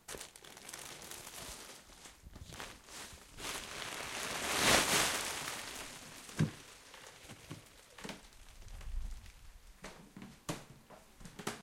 Plastic crumple and walk away
crumple, paper, plastic, cloth